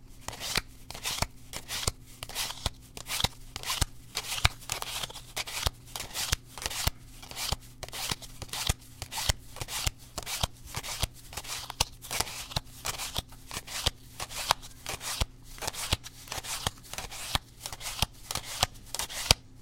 Flipping Through a Deck of Cards
flipping through a deck of playing cards one by one
cards, playing-cards